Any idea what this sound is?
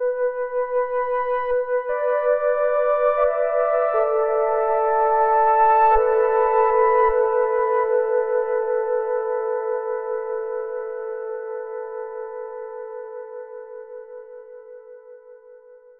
3 Coil Pad
High flute-like pad with a long tail.
flute, high, pad, sweet, synth